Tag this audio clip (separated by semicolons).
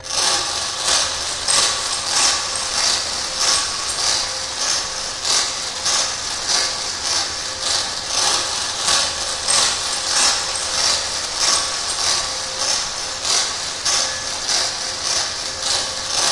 beat; lofi; noise